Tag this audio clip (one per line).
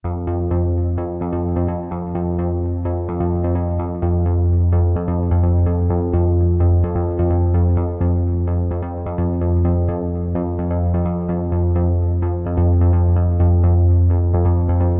edm
synth
128